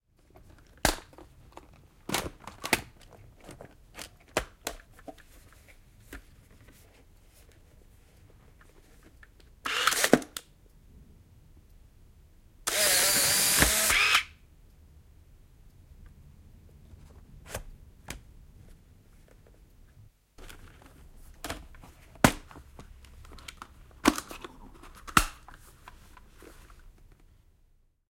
Kamera, polaroid / Photo camera, polaroid, cassette load up, shot, shutter, output, shut, a close sound, interior (SX 70)
Polaroidkamera, avataan, kasetti kameraan, laukaus, suljin, paperi ulos kamerasta, kamera kiinni. Lähiääni. Sisä. (SX 70).
Paikka/Place: Suomi / Finland / Vihti
Aika/Date: 13.12 1986
Polaroid, Shot, Laukaus, Output